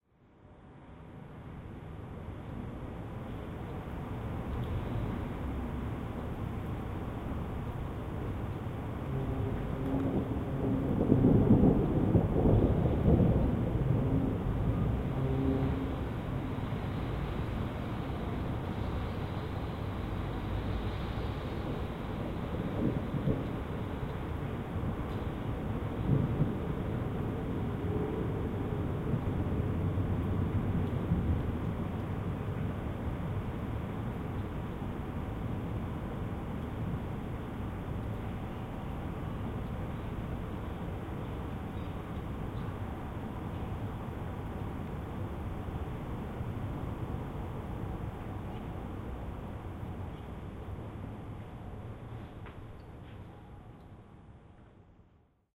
Quiet rumble of thunder in Blackheath London, recorded in stereo with an ancient Edirol R1 recorder using the built in mic.
blackheath, weather, atmosphere, thunder, rain, london